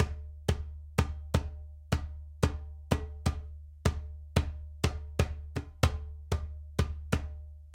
Jerusalema 124 bpm - Djembe 2 - clap rhythm 4 bars
This is part of a set of drums and percussion recordings and loops.
Djembe 2 playing the rhythm of the hand claps.
I felt like making my own recording of the drums on the song Jerusalema by Master KG.
djembe, jerusalema, loop, 124bpm, percussion, rhythm